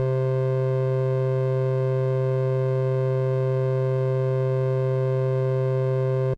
TX81z wave7
A raw single oscillator tone from a Yamaha TX81z. Half cycle sine wave with half of that inverted.